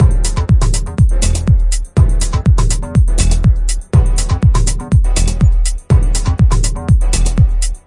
Linearity Beat Part 06 by DSQT 122Bpm
This is a simple techno loop targeting mainly DJs and part of a construction pack. Use it with the other parts inside the pack to get a full structured techno track.
122bpm, beats, constructionkit, dj, electronica, house, loop, mix, music, part, remix, songpart, synths, tech, techno